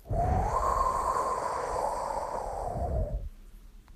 wind breeze swoosh air gust